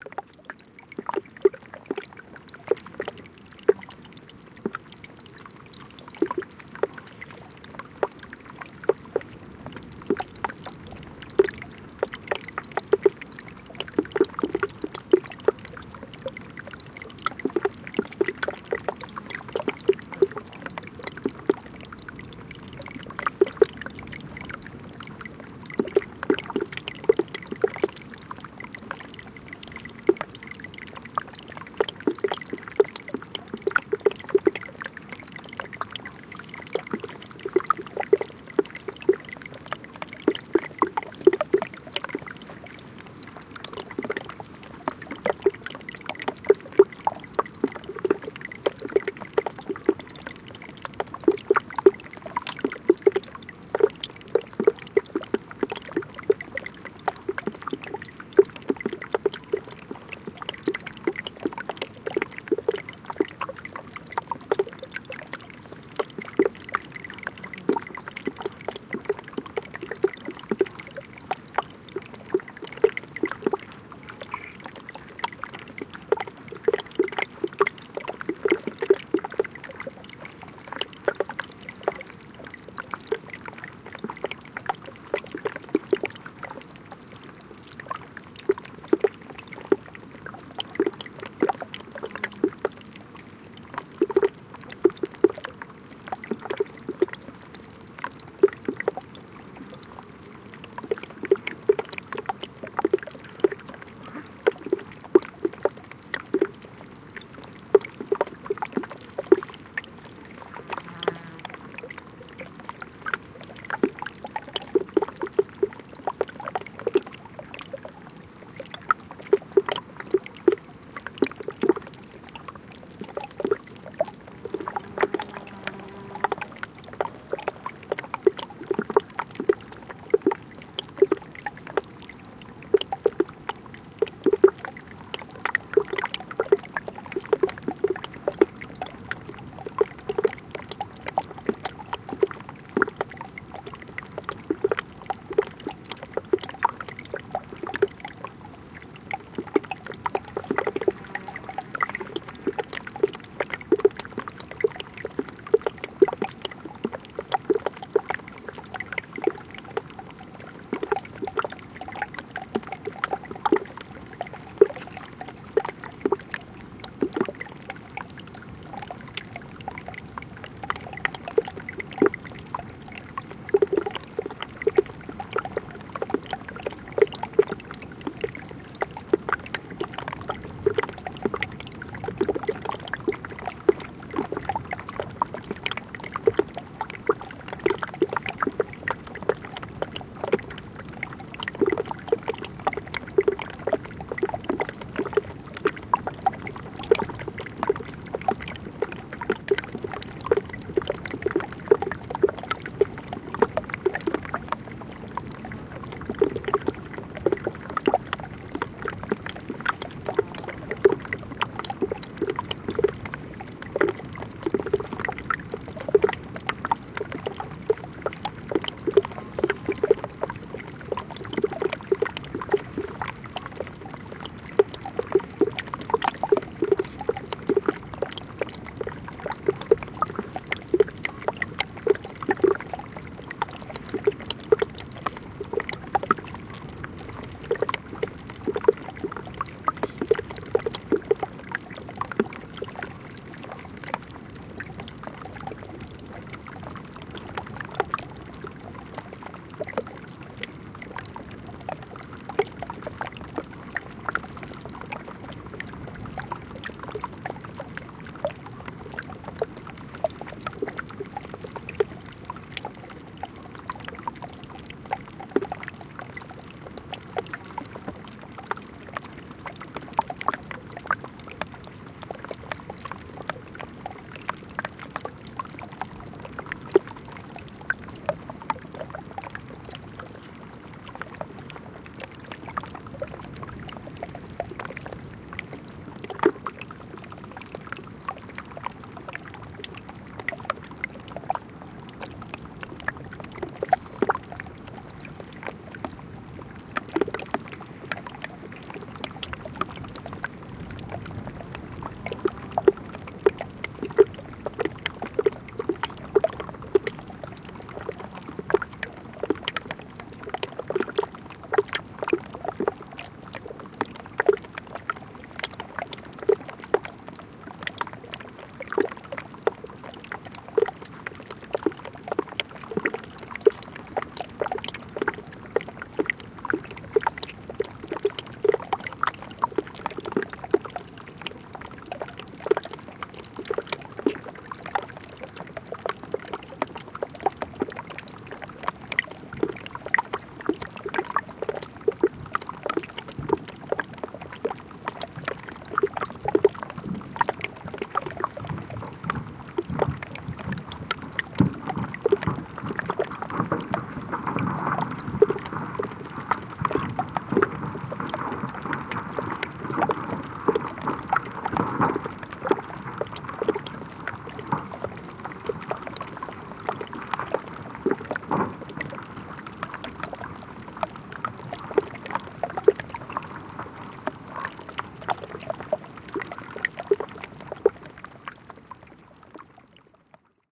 WATRMisc glacier melting and trickling through some rocks TK SASSMKH8020
Ice melting and trickling through some rocks at the edge of a glacier. At the end there is some rockfall happening in the distance.
Microphones: Sennheiser MKH 8020 in SASS
Recorder: Zaxcom Maxx